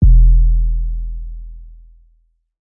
Sub Bass 7 - E
Sub bass E note. Good for hip-hop/rap beats.
bass, deep, E, E-note, heavy, hip-hop, rap, sub, sub-bass